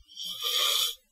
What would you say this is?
just a hiss